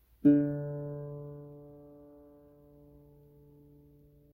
Ukelele being strummed.
music, musician, string, twang, pluck, strum